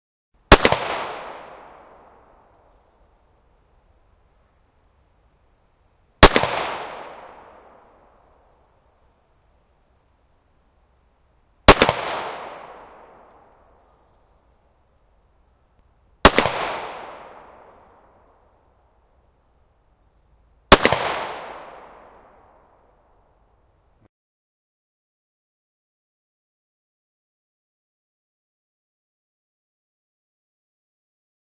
gun 9mm 5m left side m10
Field-recording of a 9mm pistol at 5 meter distance on a shooting range using a Sony PCM m-10.
9mm, close-distance, gunfire-tail, gunshot, gunshot-echo, gunshot-reverb, outdoor, pistol, shooting-range, sony-pcm-m10, weapon